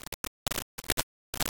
ifs-20220101-2-chaos
Noise made by interpreting an 1D fractal as an audio signal (more density = higher amplitude). Rendered via chaos game from a recurrent IFS.
chaos-game, cracking, IFS, iterated-function-system